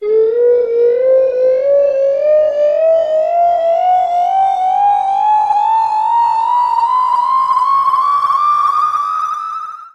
Created with granulab and cool edit from mangled voices inspired by jillys arcade sounds. Who remembers Jilly's Arcade?
granular, synthesis, jillys